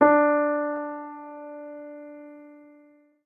PIANO MED D4
MISStereoPiano
These were made available by the source listed below.
You may also cite as a reference, link to our page from another web page, or provide a link in a publication using the following URL:
Instrument Piano
Model Steinway & Sons
Performer Evan Mazunik
Date November 5 & 27, 2001
Location 2017 Voxman Music Building
Technician Michael Cash
Distance Left mic 8" above center bass strings
Right mic 8" above center treble strings